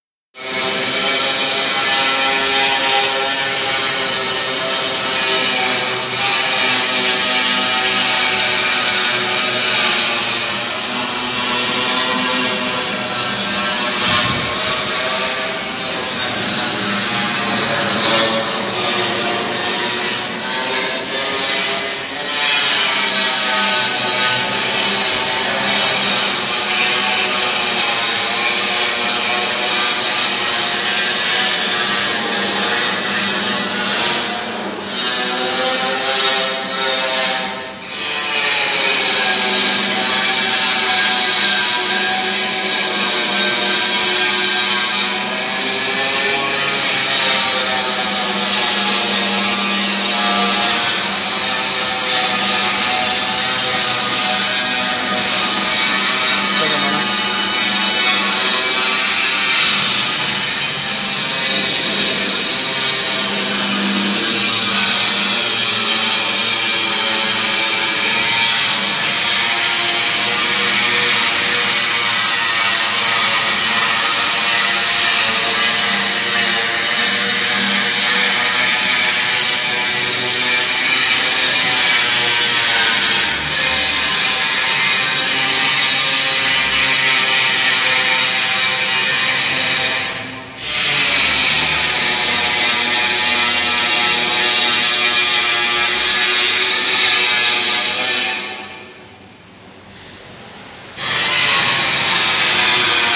Sierra en empresa
Un sonido de sierra que grabe en mi trabajo
fiel-recordin, industrial, machinery